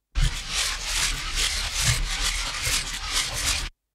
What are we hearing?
metallic paper torn backwards
backwards, paper, torn